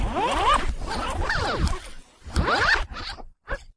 servo motors
servo motor noise (gearbox motor), flanged and phased, forward and backward.
Recorded and modified with Audacity
metallic, Space, small, electronic, Empire-uncut, servo, servo-motor, motor